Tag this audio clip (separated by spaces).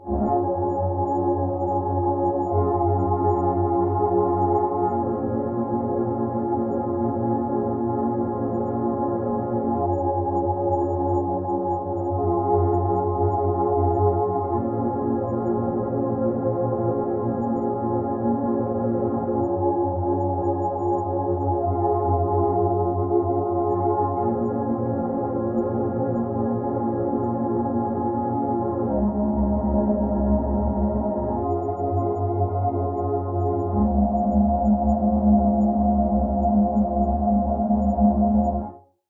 100-bpm 100bpm ambient dark loop low melancholic pad smooth warm